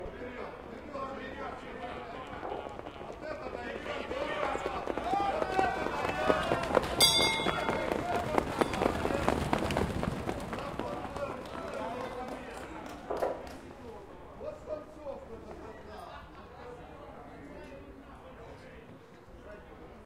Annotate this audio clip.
Finish of the race #2.
Fans in the excitation.
Recorded 2012-09-29 12:30 pm.